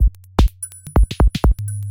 Rhythmmakerloop 125 bpm-07
This is a pure electro drumloop at 125 bpm
and 1 measure 4/4 long. Some beats are not on upbeats, so this loop has
a nice breakbeat feel. It is part of the "Rhythmmaker pack 125 bpm" sample pack and was created using the Rhythmmaker ensemble within Native Instruments Reaktor. Mastering (EQ, Stereo Enhancer, Multi-Band expand/compress/limit, dither, fades at start and/or end) done within Wavelab.
125-bpm, drumloop